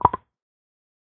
recording of my jaw popping
pop, snare, percussion, snap